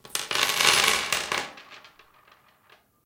different noises produced with the screws, nails, buts, etc in a (plastic) toolbox